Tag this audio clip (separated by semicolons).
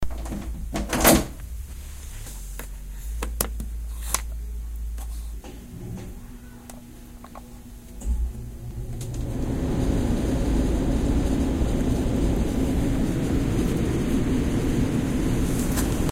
AC; air; Air-conditioner; bathroom; conditioner; door; dream; fan; house; memory